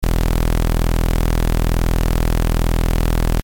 Multisamples from Subsynth software.